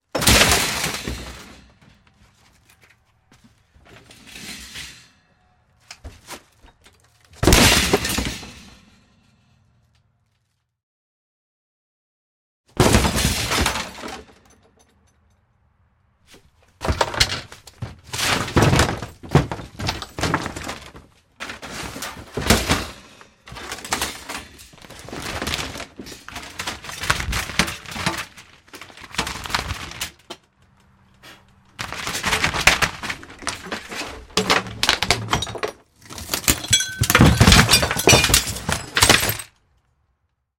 axe chops smashes wood metal grill trailer rv walls and debris glass chunky
walls, debris, metal, chunky, trailer, smashes, rv, axe, grill, chops, wood, glass